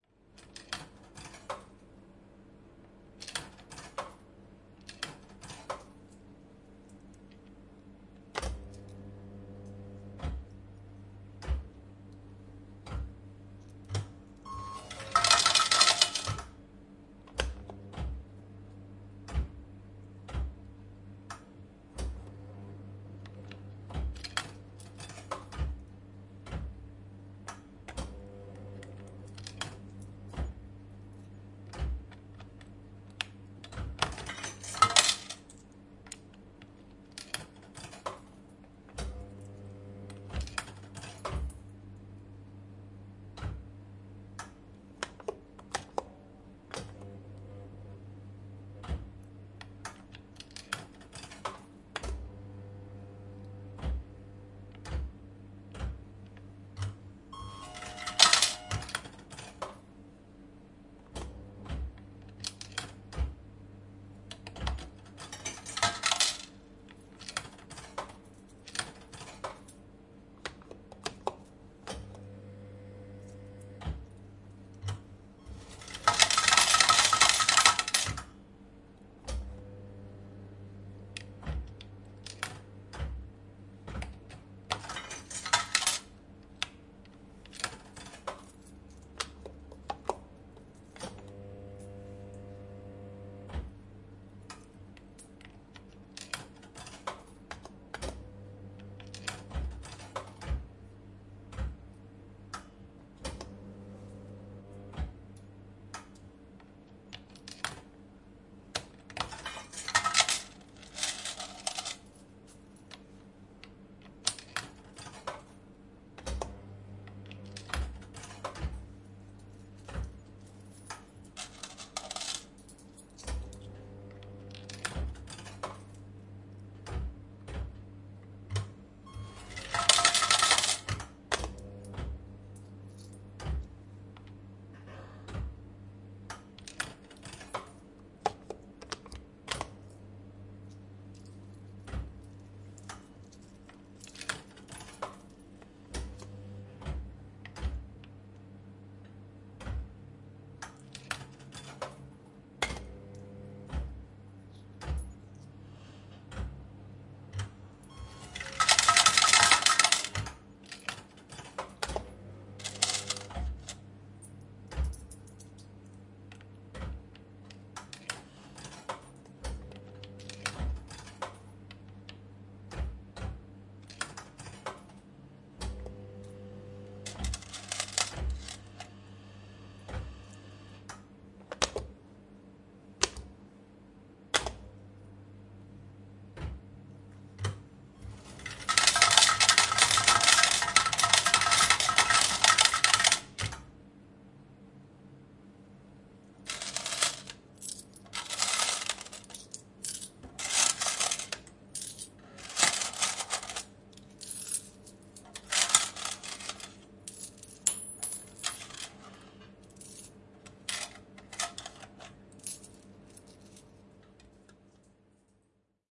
Peliautomaatti, hedelmäpeli / Old arcade game, fruit, fruitmachine, mechanical playing sounds, some elctronic signals, wins, coins tinkle
Pelaamista, automaatin mekaanisia peliääniä ja sähköisiä signaaleja, välillä pieniä voittoja, lopussa iso voitto, rahan kilinää, kolikot kerätään.
Paikka/Place: Suomi / Finland / Kitee, Kesälahti
Aika/Date: 21.08.1993
Raha, Device, Slot-Machine, Game, Fruitmachine, Laitteet, Machine, Yle